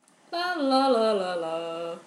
lalala-random
single-voice, female, choir